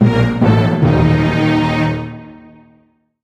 Stereotypical drama sounds. THE classic two are Dramatic_1 and Dramatic_2 in this series.
cinema, cinematic, drama, dramatic, dun-dun-dun, film, movie, orchestral, suspense, tension